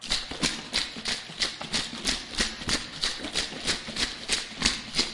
TRONEL Theo anneeScolaire runinthewater
I starded shaking my bottle of water in front of my microphone to make a sound close to an agitated sea but in fact with some reverb and echo it's like hearing someone running very fast in water. There is again a tense vibe to it.
thriller, cave, run, hurry, running, water, tense